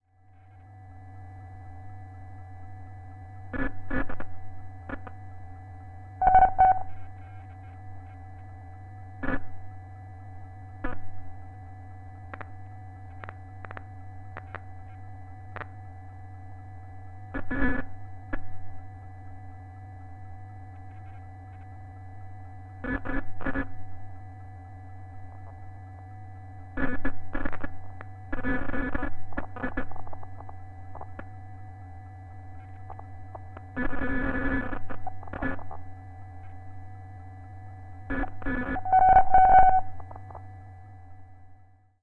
Console of spacecraft with whirring and bleeps. Made on an Alesis Micron.